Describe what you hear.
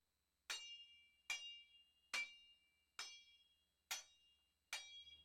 Machetes far 1
machete machete sword fight far metal metallic collision free
collision, far, machete, metal, sword, fight, free